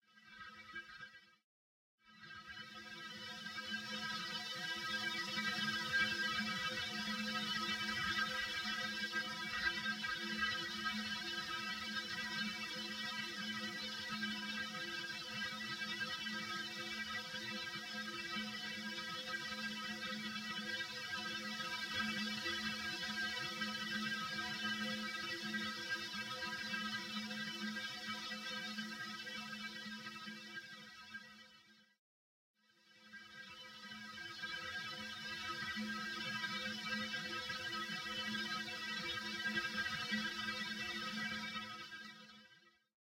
Wind mixed
Wind sound mixed with Reaper effects.
Mixing, Wind, Stereo